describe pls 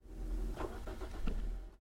A car turning over